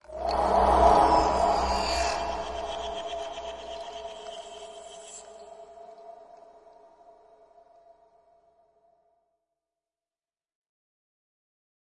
Alien Icewind 2
Tweaked percussion and cymbal sounds combined with synths and effects.
Air, Alien, Ambience, Artificial, Deep, Effect, Gas, Machine, Noise, SFX, Sound, Wind